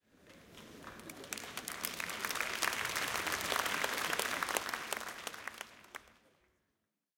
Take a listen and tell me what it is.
crowd applause theatre